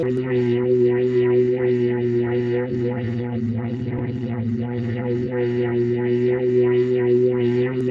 odd bamboo mouth loop
This loop was made using a homemade didjeridu. It had an odd dry tone and I made some noises in it with my mouth, then manipulated it all in Audacity. Kind of indescribable but hopefully it can be of use to someone...